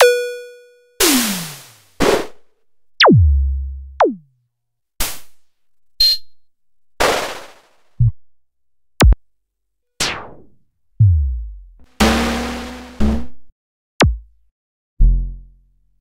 PULSE2 ANALOGUE DRUM SAMPLE CHAIN x 16
SAMPLE CHAIN for octatrack
octatrack, SAMPLE